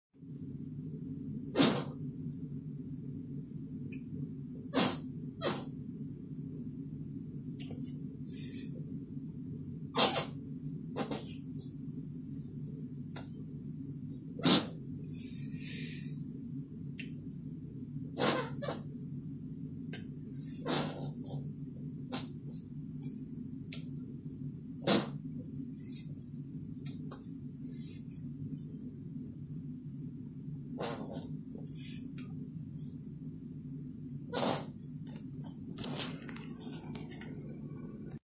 If you may or may not have guessed with the audio spacing, i happen to own a whoopee cushion
Recorded with my LG Stylo 3 Plus